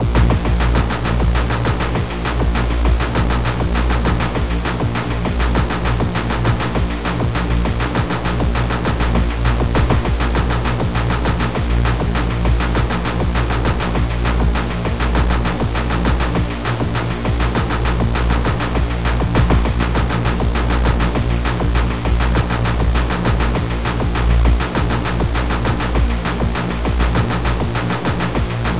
best dramatic game music for a shooting game
film, dramatic, game, action